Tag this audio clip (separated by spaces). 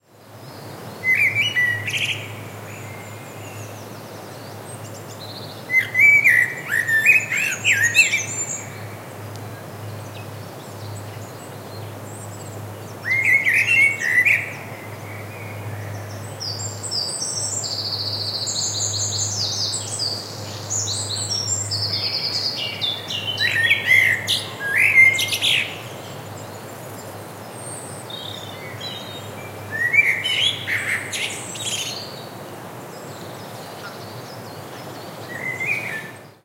Gloucestershire Woodland birds birdsong blackbird morning nature spring